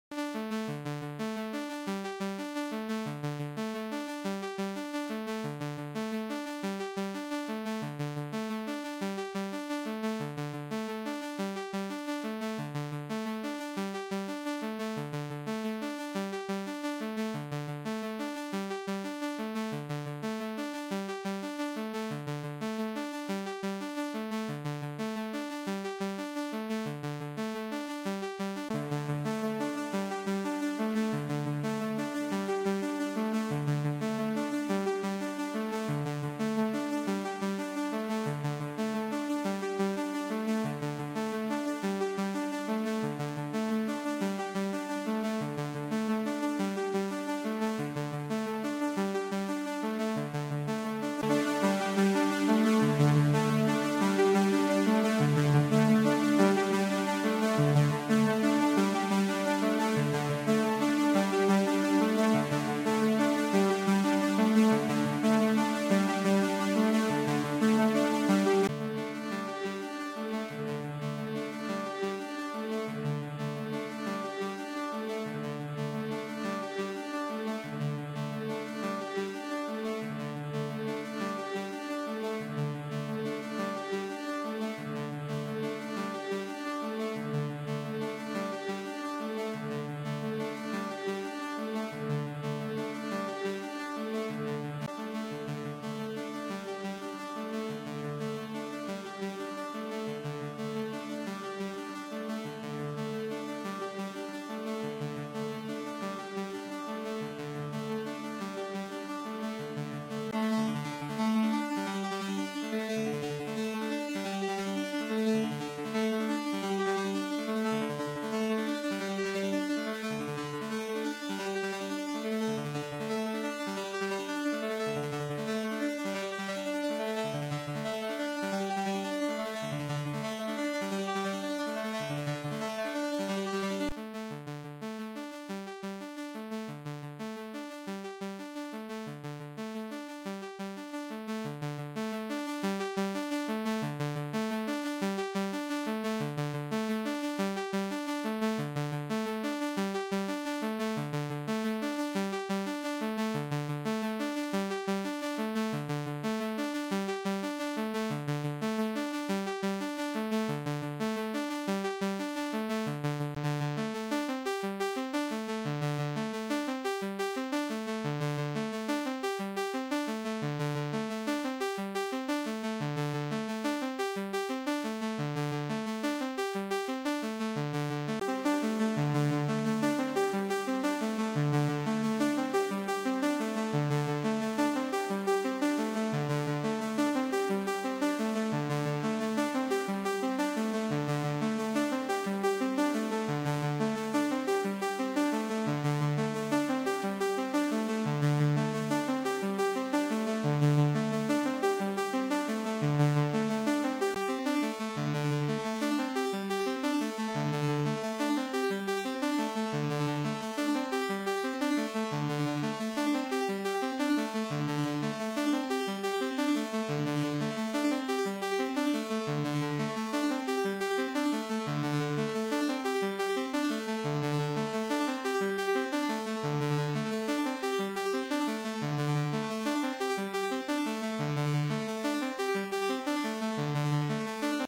OB-8 arpeggio sample #1. It is 3:54 minutes long. There are 12 variations throughout the sample. Recording path: Oberheim OB-8 to a Mackie 1604 to a TASCAM DA-30 MkII. Outboard gear: Lexicon LXP-1. The sample starts with a base version (no effects). Variations are mostly patch changes on the LXP-1. A few variations are changes in the arpeggio itself. Originally recorded in 1996. My plan was to create a large sample library based entirely on the OB-8. Audio editing software was expensive and (really) not very good. At that time the project was abandoned. I still may clean up these samples a create a true loop enabled library (REX, ACID, etc.). I am uploading four out of the eleven original OB-8 samples.
arpeggio, ob-8, oberheim, synthesizer